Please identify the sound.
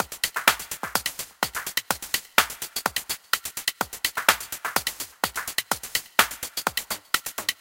HH filter3
FX, filter